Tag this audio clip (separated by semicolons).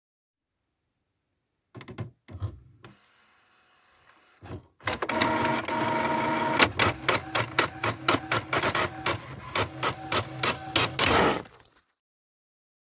paper; print; printer